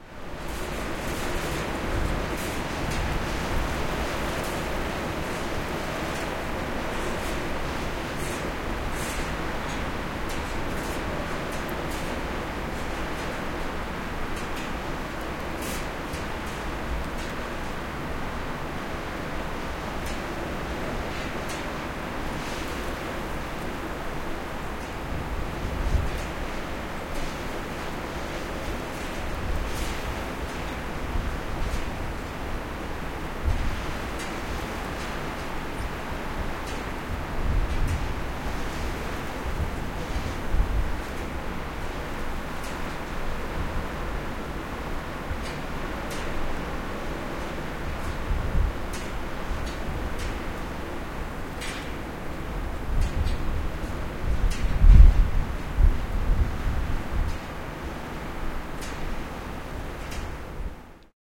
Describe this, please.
King's Hut Day Through Window
Wind blowing around a ruin. The clanging you hear is of rust wire blowing in the wind.
birds, desert, field-recording, nature, wind